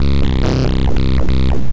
140 SynergyTek Synth 01 D
lofi synth piece
drums, filter, free, guitar, loops, sounds